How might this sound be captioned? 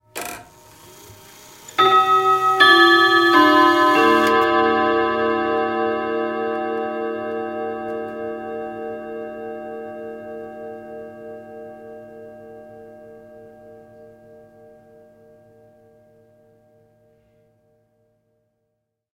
1 gustav becker westminster quarter
1920s Gustav Becker wall clock chiming the quarter hour.
Recorded with Rode NT2A microphone.